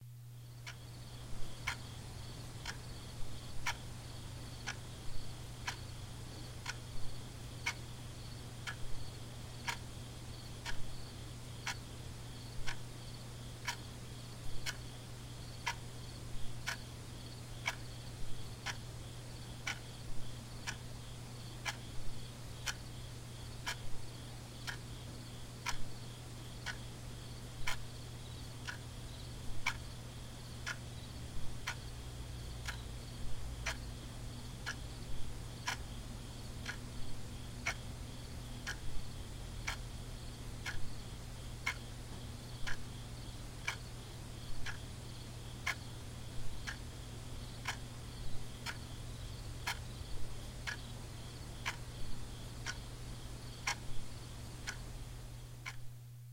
room amb in night with clock tik tak (tic-tac)
recorded by: blue spark
forest, tic-tac, background-sound, night, atmosphere, watch, wall, ambience, general-noise, background, ambient, tik, amb, atmospheric, atmos, noise, white-noise, room, atmo, tak, ambiance, soundscape, forest-night, clock